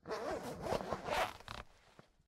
Zipper being zipped.
{"fr":"Fermeture éclair 8","desc":"Fermeture éclair.","tags":"fermeture éclair zip fermer ouvrir"}
coat, jacket, unzip, zip, zipper, zipping